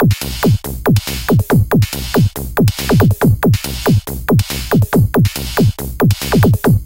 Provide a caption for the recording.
ultra hardcore beat sample
rave, ultra-hardcore, techno, dance, club